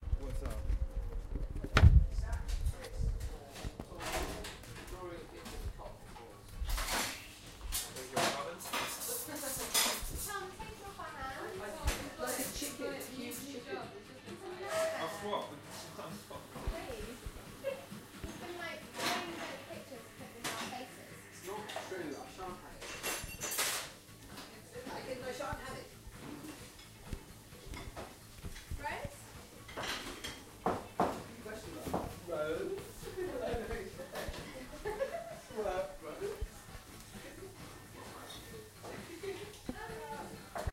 17 Wilton Way Cafe
Sounds of Wilton Way Cafe